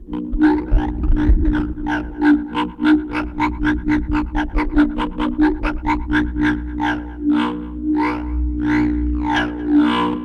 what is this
Recorded with a guitar cable, a zoom bass processor and various surfaces and magnetic fields in my apartment. Spinning he cable in and out of the monitor's magnetic field...